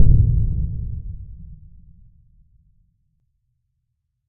Lots of lows, perhaps inaudible on small speakers. Not quite so "ringy" or gong-like as the original Cinematic Boom, and perhaps better because of that fact. This one has more mid-range; for less, see the similarly-named samples with higher numbered suffixes. Created within Cool Edit Pro.